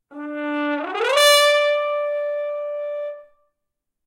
A horn glissando from D4 to D5. Recorded with a Zoom h4n placed about a metre behind the bell.